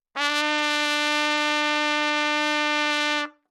overall quality of single note - trumpet - D4
Part of the Good-sounds dataset of monophonic instrumental sounds.
instrument::trumpet
note::D
octave::4
midi note::50
tuning reference::440
good-sounds-id::1098
dynamic_level::f
multisample, good-sounds, neumann-U87, trumpet